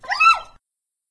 A dog yelp from a labrador retriever
Animal, Big, Dog, Labrador, Labrador-Retriever, Retriever, Yelp